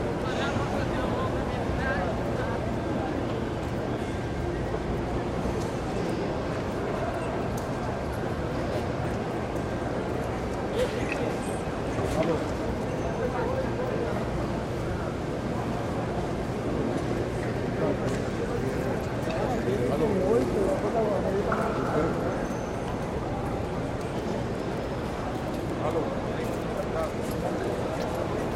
AMB MERCADO PUBLICO 1 porto alegre brasil
alegre, ambience, market, porto, public